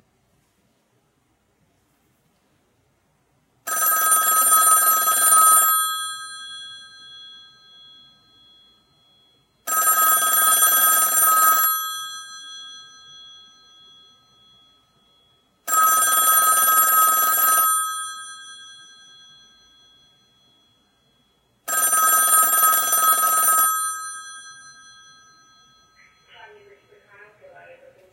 Early 1960's Stromberg Carlson 1543 rotary dial telephone ringing. Four rings with actual telco timing, recorded with Zoom H4.
ring, ringer, telephone, ringtone